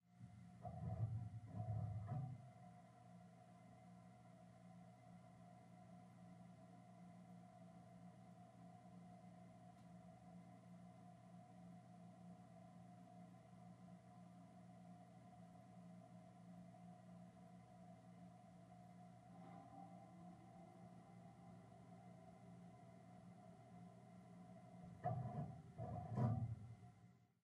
Heizkörper groß
This is the big radiator in my living room. I turn it on for a wile, then off again. The sound is taken with an AKG Condenser Microphone and an Audio 4 DJ soundcard.... Enjoy imagine this big radiator. It is pretty nice warm inhere!:-D